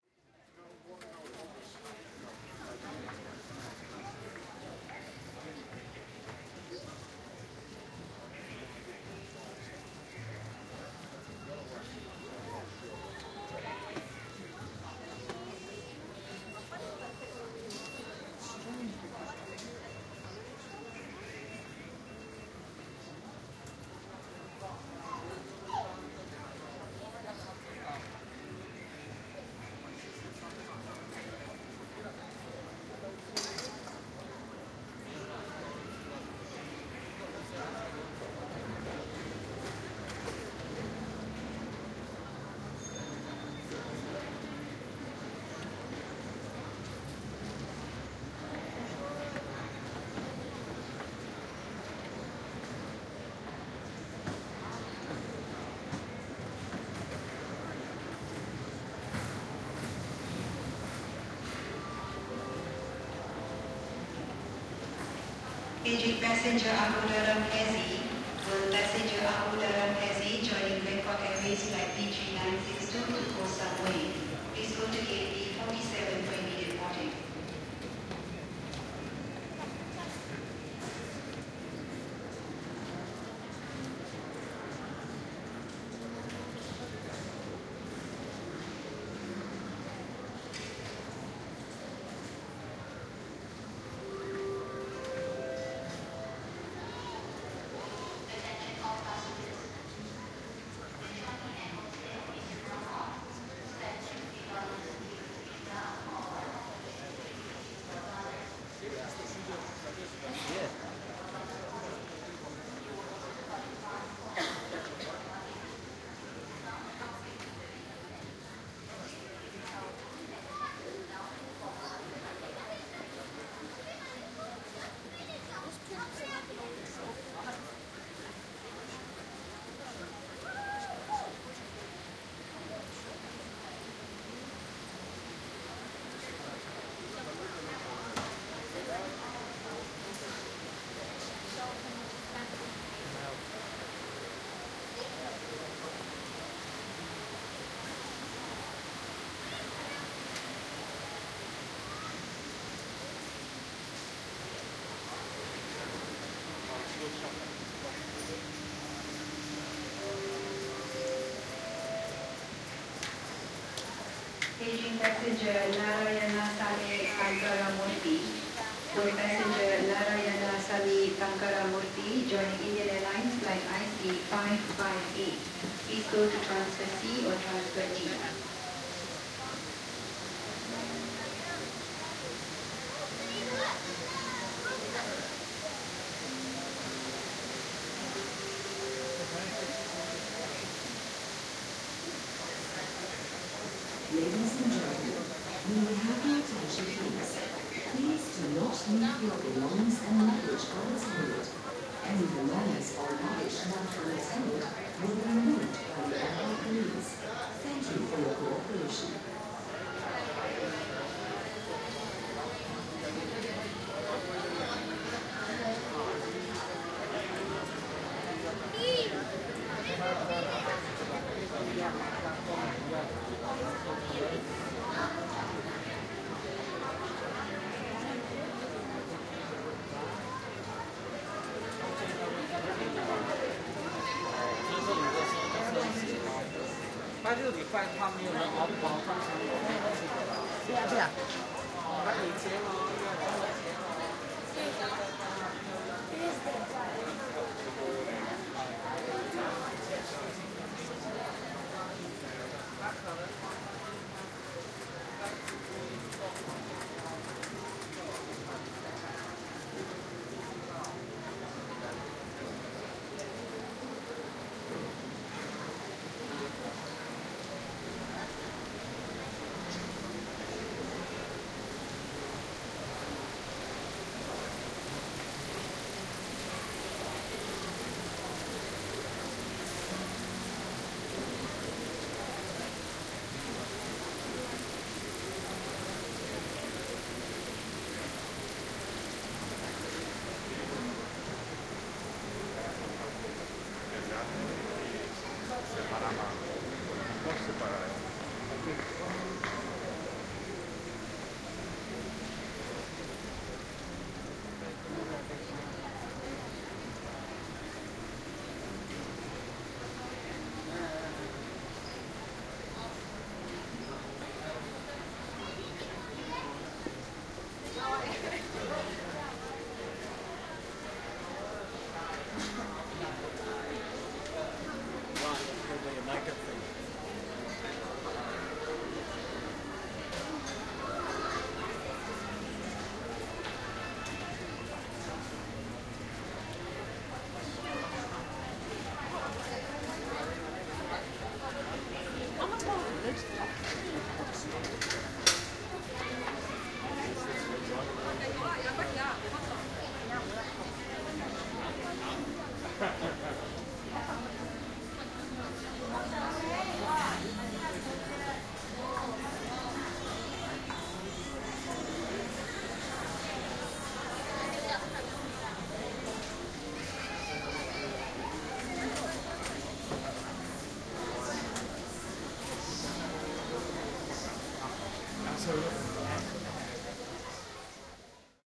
Changi Airport 1
This is just over 6 minutes of sound from Changi Airport (Termnial 1) walking around the duty-free shopping area. This is a carpeted space and one of the busiest international hubs. The 'white noise' you can hear in a few places are water-falls and water features. A few announcements can be heard along with snippets of various conversations in the crowd, these become more frequent at in the second half of the recording.
crowd, field-recording, binaural, changi-airport, singapore, terminal-1, airport, announcement